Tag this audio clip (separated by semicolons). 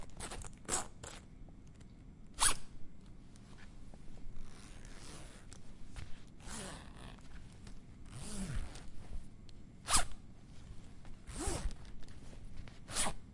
cremallera; metalico; chaqueta